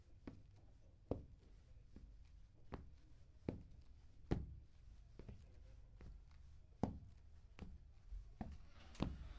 PASOS SOBRE MADERA
Es el sonar de los pasos sobre una superficie de madera